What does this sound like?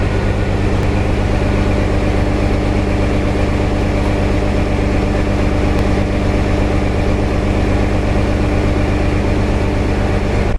HST Idle high2
Just a Class 43 HST with its original Paxman Valenta idling away just raring to go. This is the rear powercar in what I believe they call "generator mode" either way, the rear powercar supplies power to the entire train, hence why it's always revving, this ones just singing in a different key, they tend to very
valenta, engine, powercar, intercity, 125, paxman, hst, grawl